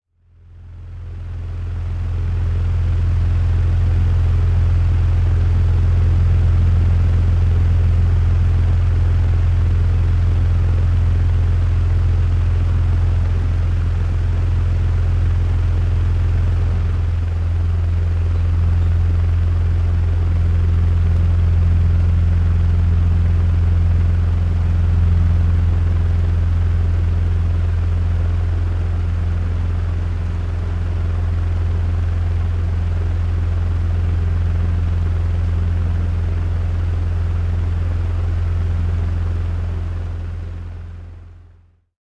Recording of Old Metal Table Fan. Mic: Rode NTG2 Recorder: Zoom H5
fan, idle, indoor, metal, table-fan